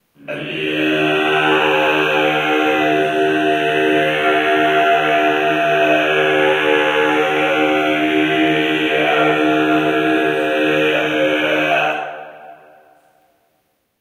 Throat Singing in a Cave
I layered some takes of throat singing. Found a nice cave in the Pyrenees to do it. Recorded with an Olympus LS-12. No additional reverb.